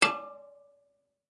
Radiator Hit Music Stand
hit, metal, percussive, radiator